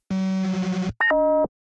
08-data-transmission
Synthesized version of drone data transmission sound from Oblivion (2013) movie
Synth: U-HE Zebra
Processing: none